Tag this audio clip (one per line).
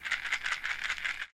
tape
mojomills
vintage
lo-fi
lofi
collab-2
bottle
Jordan-Mills
pill